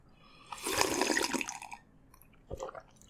A sound effect of me drinking tea.
coffee, cup, drink, drinking, liquid, sip, sipping, slurp, swallow, tea, water